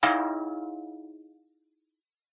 Thin bell ding 4
A short, thin bell chiming.
bell, chime, ding, dong, short